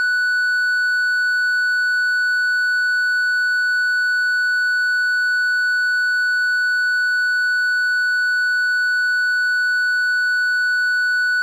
Sample of the Doepfer A-110-1 sine output.
Captured using a RME Babyface and Cubase.